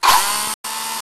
JBF Broken Drill 1
A broken electric drill. (1)
shop, tools, broken, electric, mechanical, tool, drill